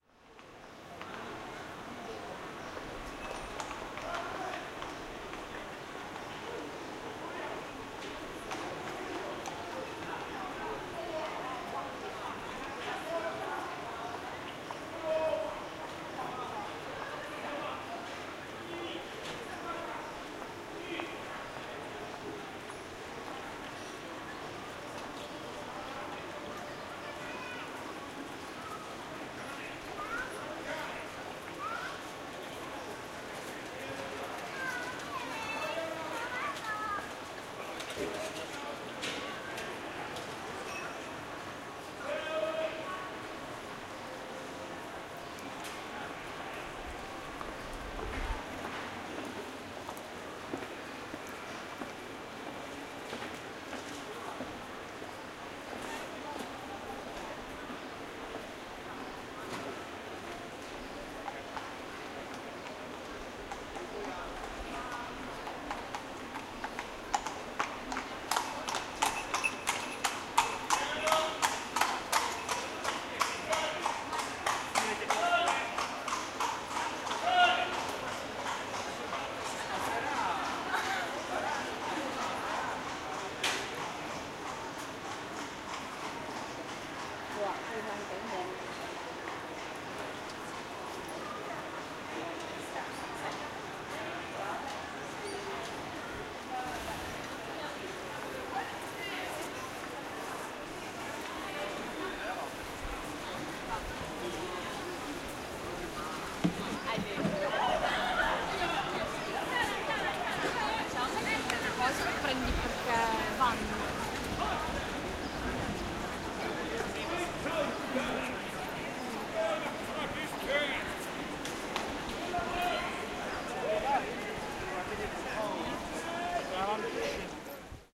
0403201225 piazza della signoria
20 mar 2004 12:25 - Walking in Piazza della Signoria
(a large pedestrian square in the centre of Florence, Italy). Tramp
voice, heels footsteps, horse-drawn cab, female voices and screams
voice, female, voices, footsteps, tramp, heels, horse-drawn, firenze, cab, field-recording, florence, screams